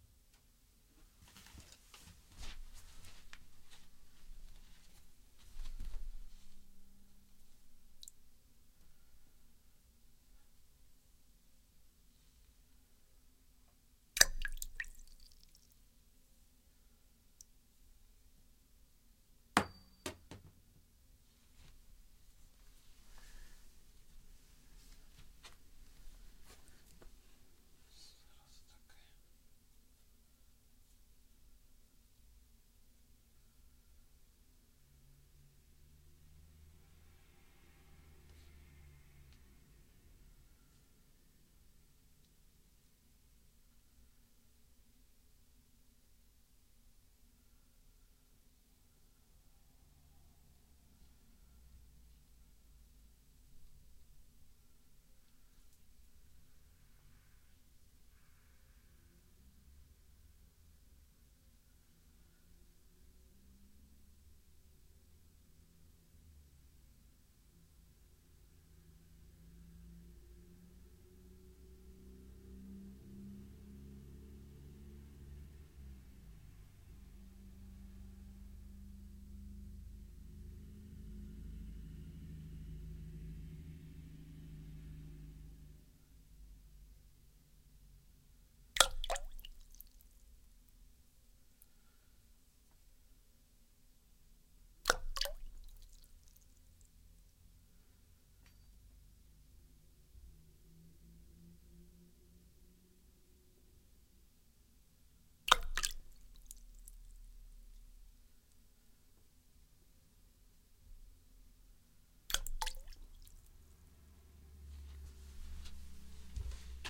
ring drop water 2
wedding ring drop at water. recorded Audiotechnica 4040 / ROLAND OCTA CAPTURE